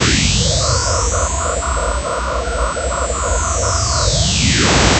Random noise generator.